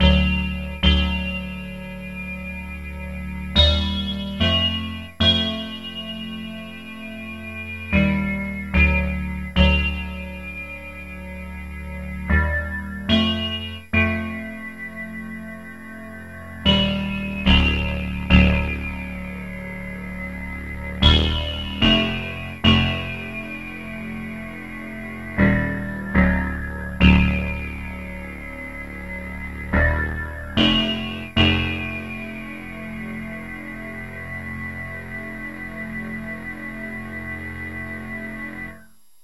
hauptteil fx2
harmonies with fx for sampling.
an1-x, freehand, played, syntheline, yamaha